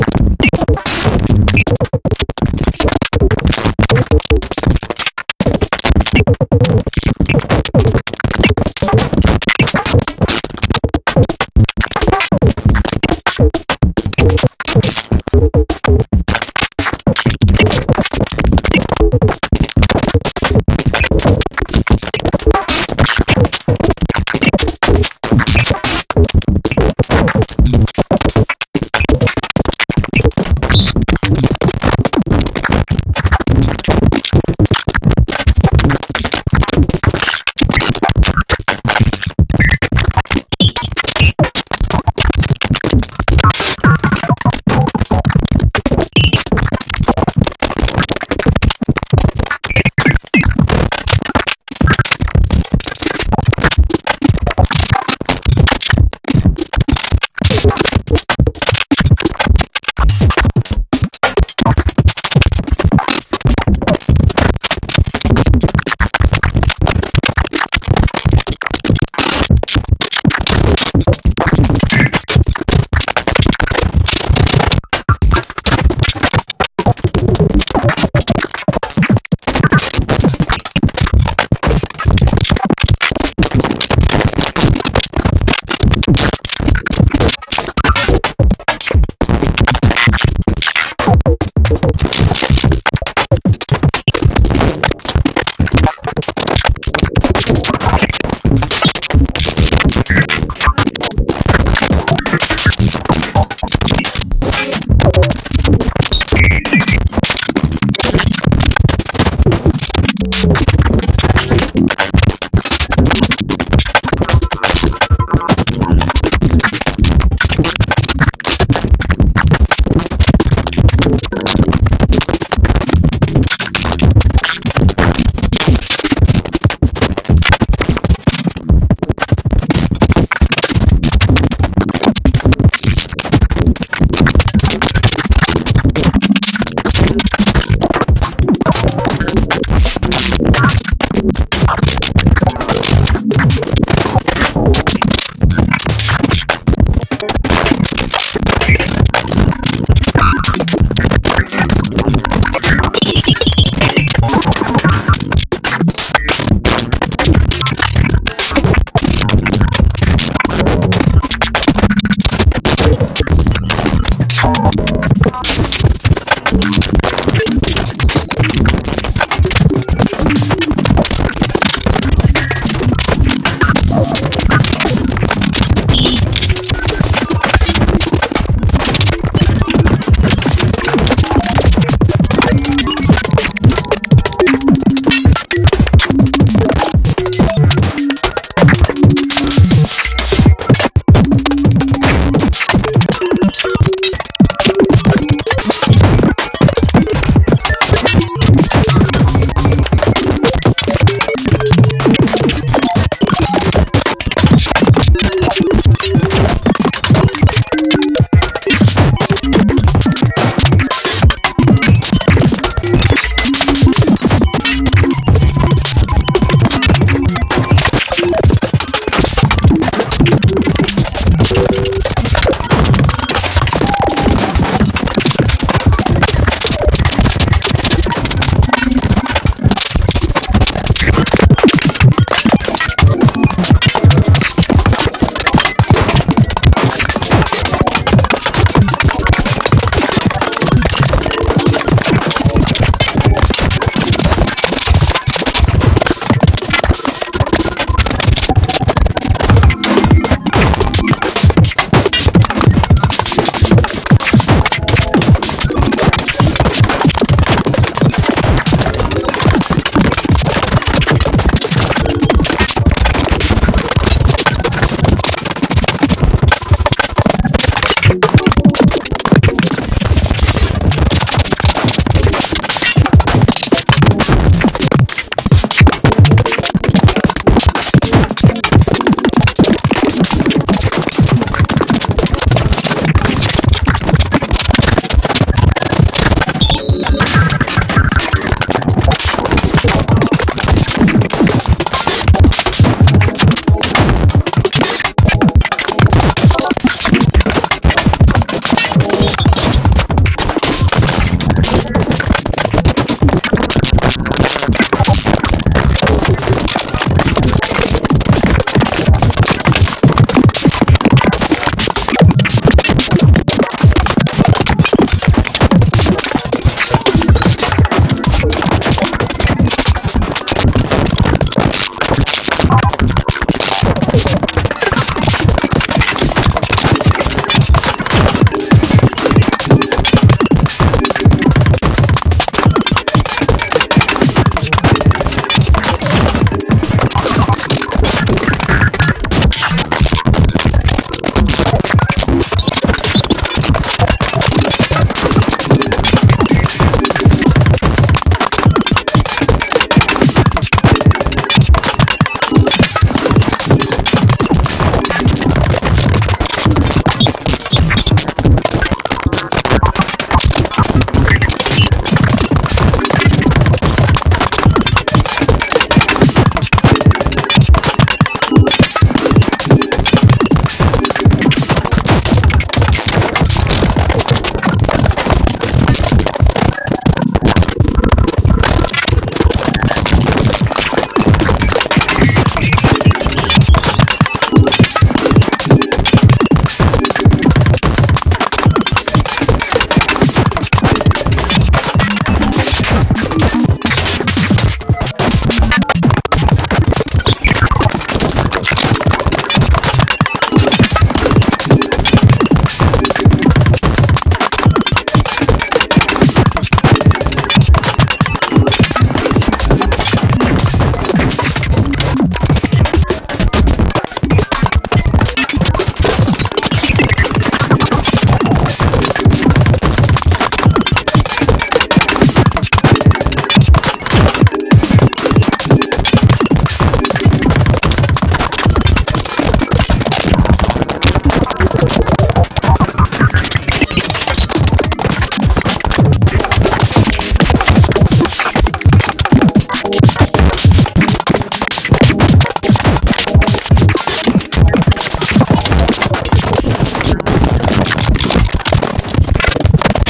An old recording made with a tool I developed in Max/MSP called "Smooth Otter"